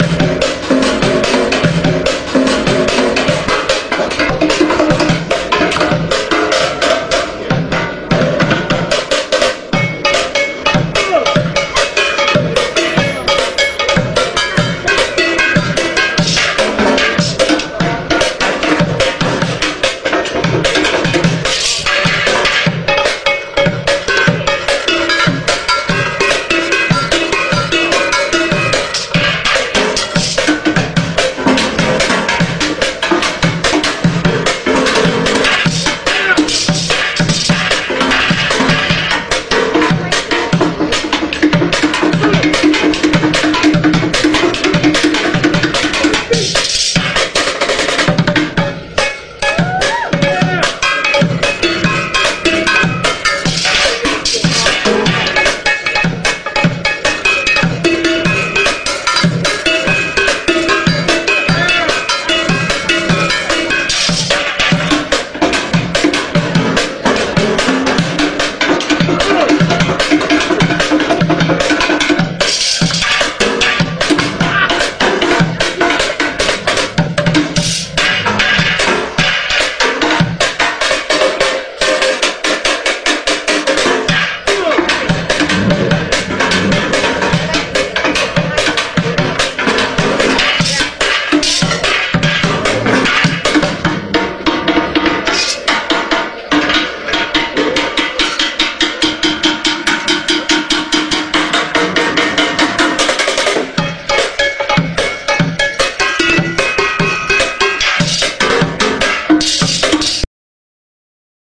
? street performer extraction loop
african street drummer extraction.
Rework with adobe audition and soundforge 7 and plugin vst "tridirt"